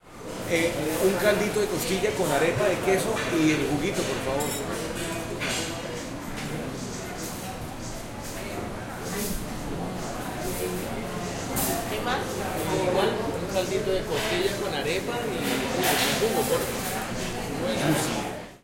20Toma1PALOMINORestauranteVOCESCaldodecostilla
field-recording, grabacion-de-campo, paisaje-sonoro, palomino-sounds, proyecto-SIAS-UAN, SIAS-UAN-project, sonidos-de-palomino, soundscape